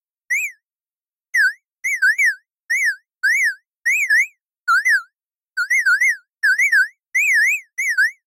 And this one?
sonido emulado en reason